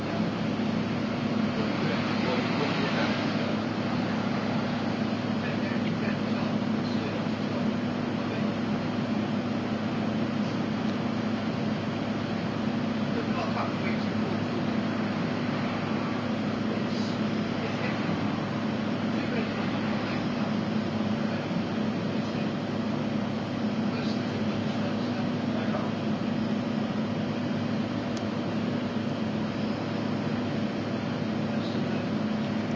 Commercial Fridge
Recorded in a local newsagents. Some sound clean up may be required
noise, electric, machine, appliance, motor, fridge